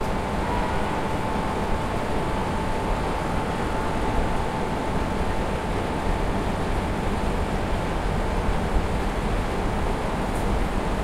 air conditioning 4
Hum of air conditioning split-system (outdoor part).
Recorded 2012-10-13.
air, Omsk, conditioning, noise, split-system, hum, air-conditioning, Russia, town, city, street